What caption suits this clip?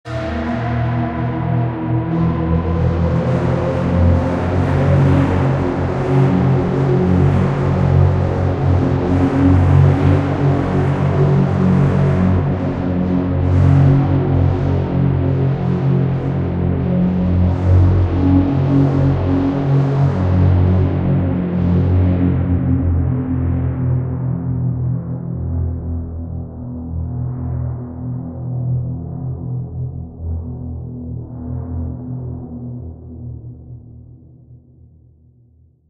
Scary drone
An image resyntesized into a horrific soundscape with Harmor. Good for scary background noise.
Drone, Horror, Scary, Scifi, Soundscape, Space, Spooky